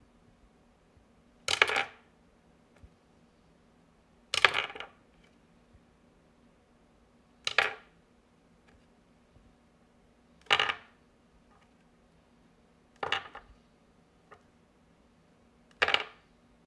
A plastic headset being dropped onto a wooden desk
bluetooth, wood, headset